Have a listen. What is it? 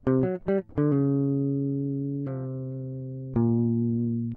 guitar riff 4
acid, apstract, funk, fusion, groovie, guitar, jazz, jazzy, licks, lines, pattern